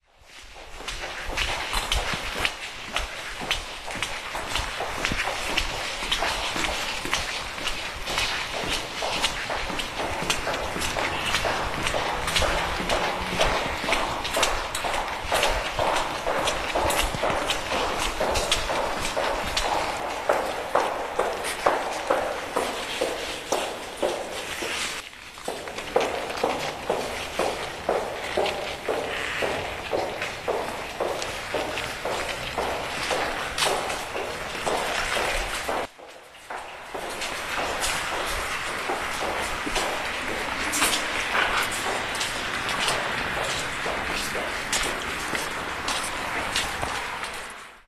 high-heels 100510
10.05.2010: about 23.30. High-heels sound. A little underground on the Towarowa street, near of the Drweckich Park, Wilda district, Poznan, Poland.
more on:
poznan, woman, underground, field-recording, night, high-heels, steps